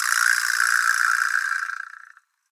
vibraslap small02
Small vibraslap single hit.
percussion, quijada, special-effect, latin, rattle, vibraslap